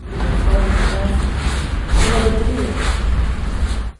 A recording of a people wiping their foot at Casa da Música entry carpet.